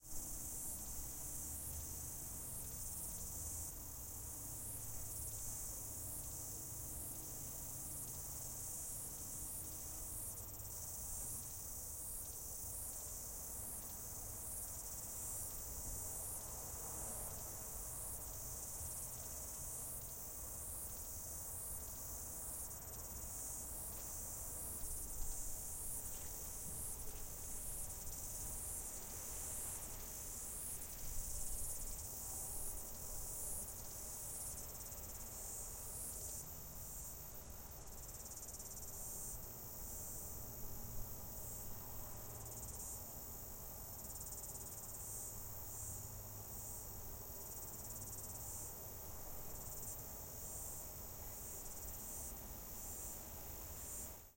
Outdoor Ambience - Cicadas
Summer outdoor ambience that's dominated by cicadas. Also features a light breeze. Represents a typical summer's day in Auckland, New Zealand. Recorded with a Zoom H6.
For ambience with birdsounds see the 'Garden Ambience' in this pack.
ambiance, ambience, ambient, Auckland, breeze, cicadas, field-recording, garden, insects, nature, New-Zealand, outdoor, outside, summer